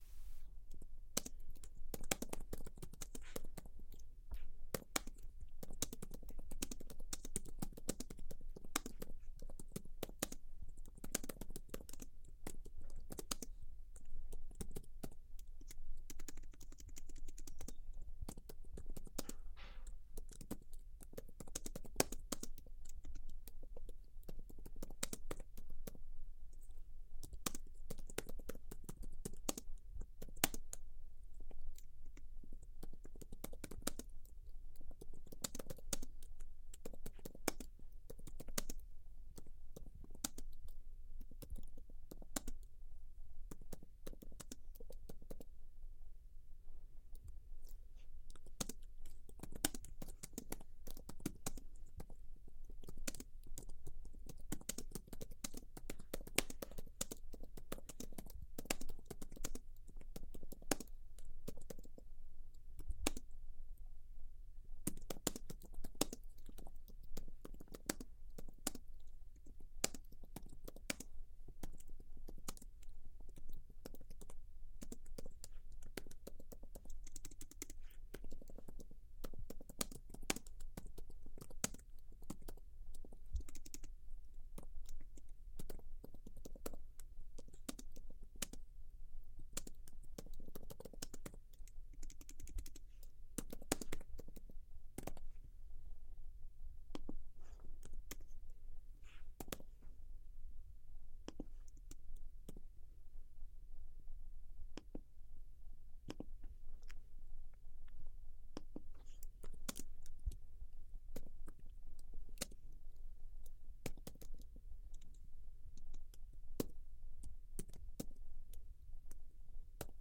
A person typing on a keyboard.
Clacking, Clicking, Keyboard, Typing